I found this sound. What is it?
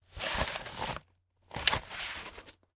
14 Cardboard Flap
cardboard, paper, box, foley, moving, scooting, handling,